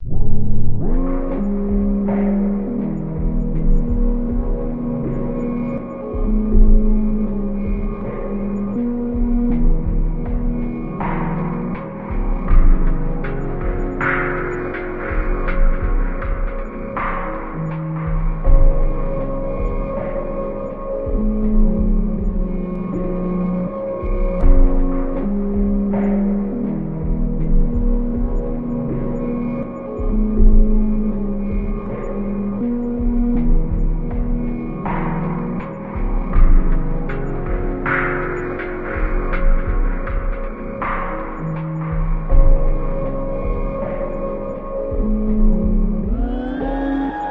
Slowed Down Piano & Drums
This sound or sounds was created through the help of VST's, time shifting, parametric EQ, cutting, sampling, layering and many other methods of sound manipulation.
Ambiance, atmosphere, effect, electronic, Enveloped, hop, Loop, Looping, Modulated, music, Piano, sample, sound, Sound-Design, stab, stabs, Synth, trip